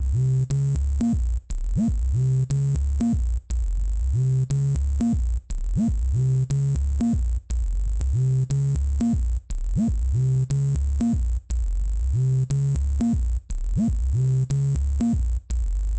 dramatic and minimalist theme with psychadelic salsa piano and some kind of sub dub bass
You can use the full version, just a piece of it or mix it up with 8 bar loopable chunks.
danger, salsa, music, drama, loop, dub, bass, sub, synth, retro, circus, promise, psychadelic, game, theme, pact, piano, phantom, loopable, video, dramatic, electro